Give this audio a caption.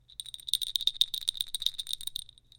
Jingle-Bell3
Waving a couple of jingle-bells, recorded with Neumann TLM103
rattle, bell, shaking, claus, santa, christmas, jingle-bell, waving, jingle